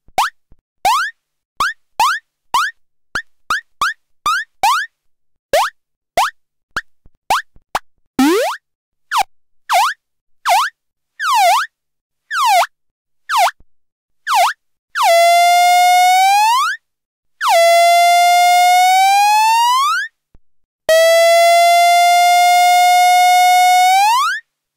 cartoon bounce synth pitch bend
Pitch bend sounds recorded on an analog mono synthesizer to simulate jump 'round' sounds in classic video games and animations.